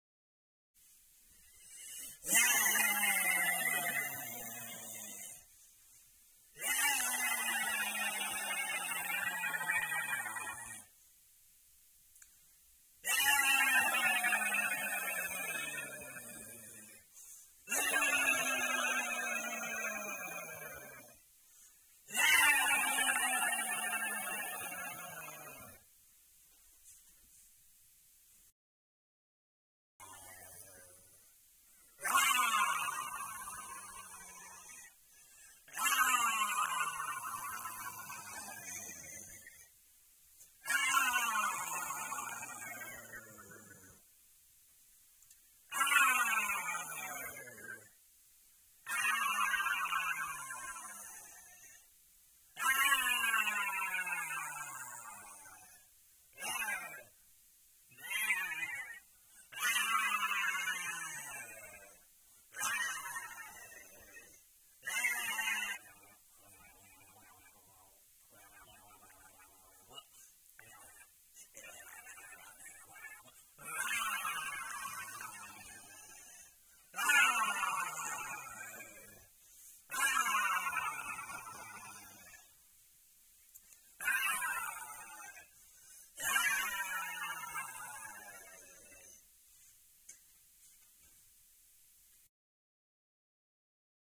vampire male
angry, vampire